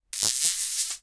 Oidz Magnet, A (H1)
Raw audio of a pair of oidz magnets being thrown in the air and colliding together. Recorded simultaneously with the Zoom H1 and Zoom H4n Pro to compare their quality.
An example of how you might credit is by putting this in the description/credits:
The sound was recorded using a "H1 Zoom recorder" on 6th November 2017.